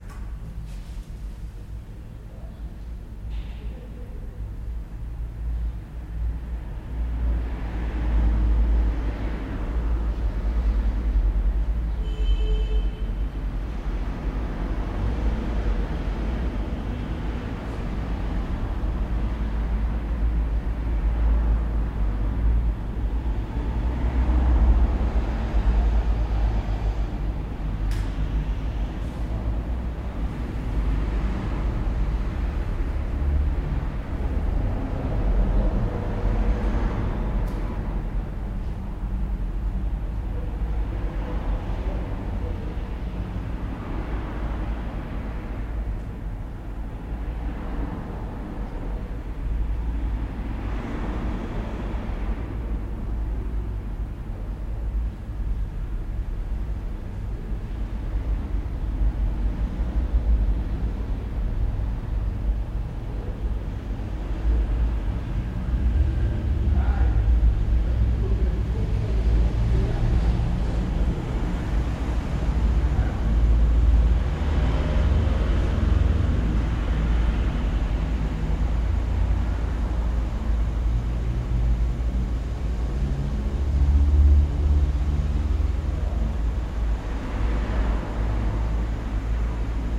Staircase Ambience INT 1st Floor Hum Traffic
This is a recording of the ambience in the staircase of a building in which my studio is located. Very nice hum, some people talking, traffic and background noises.
Indoors, Staircase